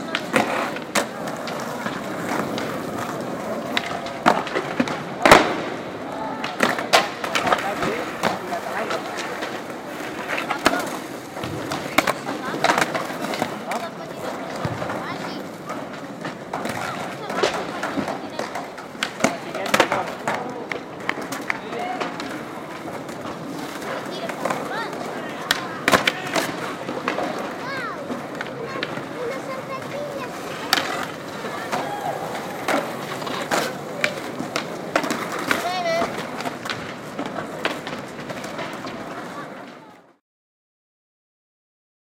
This is a field recording of the entrance of MACBA museum of Barcelona. There are a lot of skaters. Micro: AUDIO-TECHNICA condenser (mono) AT835b in a DAT SONY TCD-D7. Edit: PROTOOLS. Place: Macba, Barcelona, Catalonia, Spain.
macba, skateboarding, skate